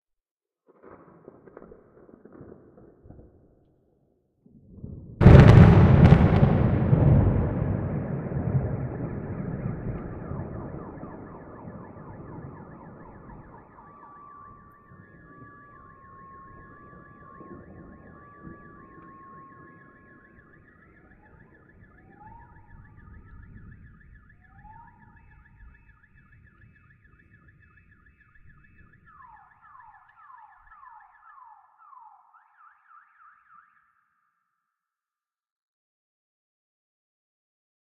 Sounds of cars being scared by thunder. Recorded with zoom h4n.
thunder, sirens, storm